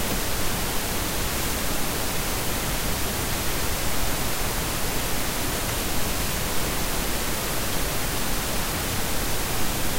My headset was plugged in into internal audio device, capture level sets to maximum and used command:
Yes, guys, it's Linux!
So pretty noise generated by very cheap device!
noise, internal-audio-device, computer